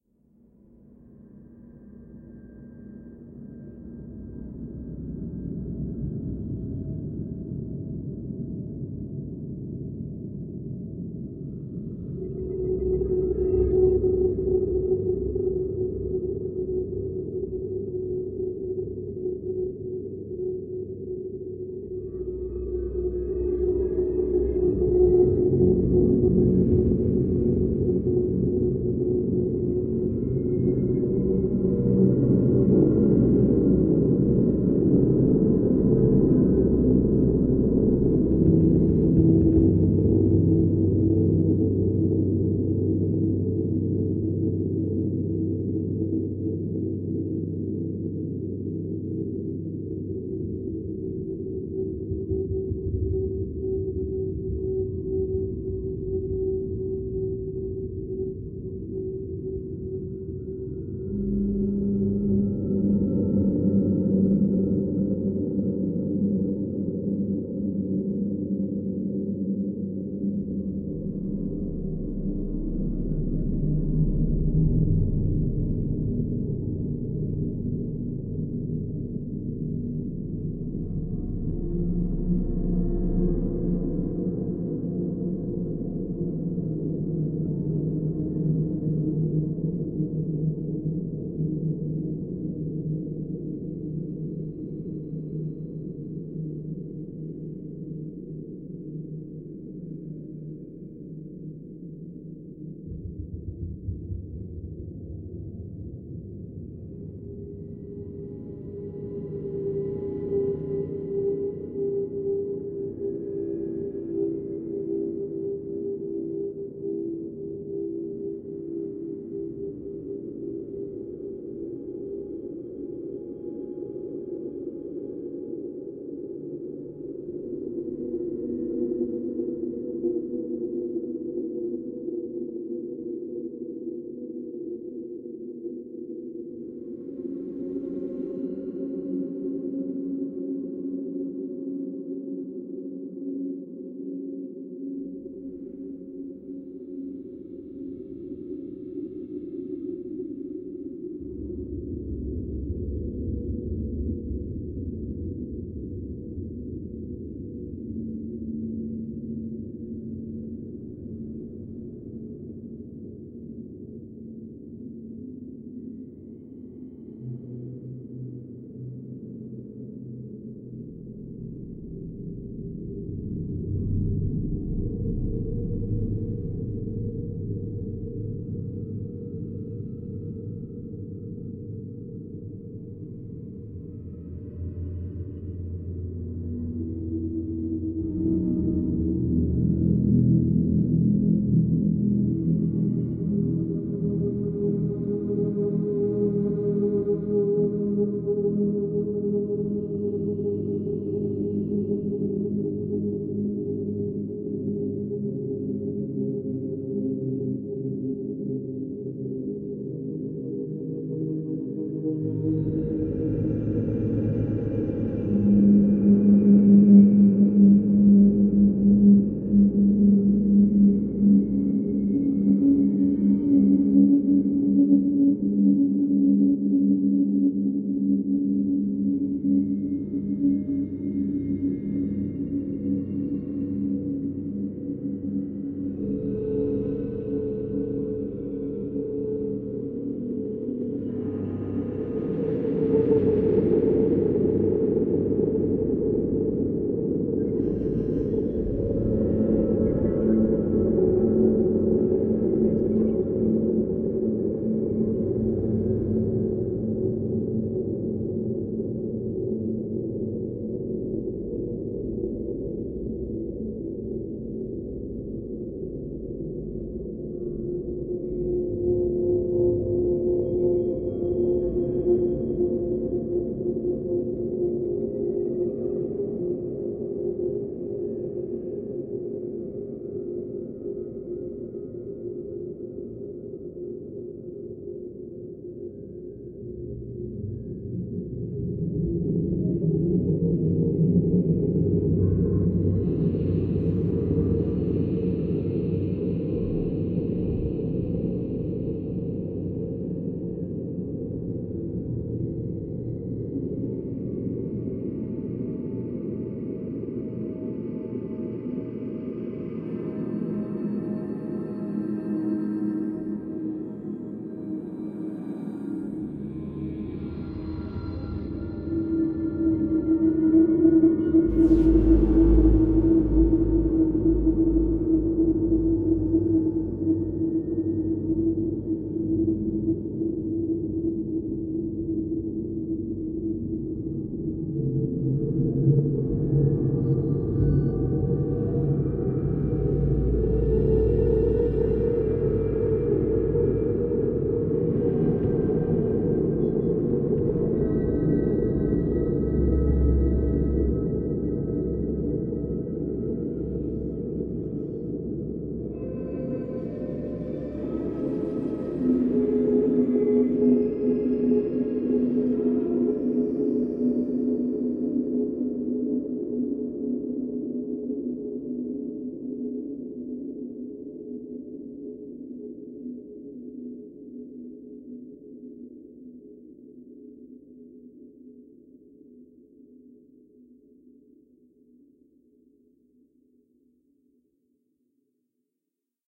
Dark Ambient 023
ambience, ambient, atmo, background, background-sound, evil, experimental, film, horror, oscuro, ruido, soundscape, tenebroso, terror, white-noise